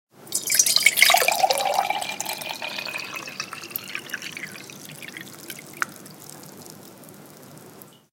1 Shot Pour.
Feild-recording, Wind, Water, Fire, Earth.
Fire, Water, Feild-recording, Wind, Earth